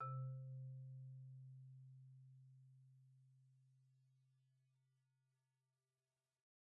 Sample Information:
Instrument: Marimba
Technique: Hit (Standard Mallets)
Dynamic: mf
Note: C3 (MIDI Note 48)
RR Nr.: 1
Mic Pos.: Main/Mids
Sampled hit of a marimba in a concert hall, using a stereo pair of Rode NT1-A's used as mid mics.
one-shot, idiophone, percussion, wood, pitched-percussion, instrument, sample, mallet, marimba, hit, percs, orchestra, organic